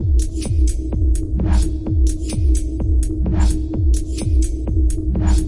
Dark Techno Sound Design 07
Dark Techno Sound Design
Dark, Design, Sound, Techno